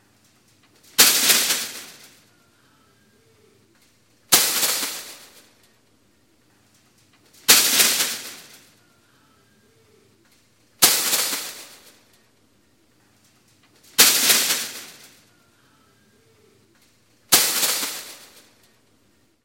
Metal light dropping into medium bin x6
Light gauge metal frames dropping onto scrap pile
Factory, Industrial, Metalwork, Metal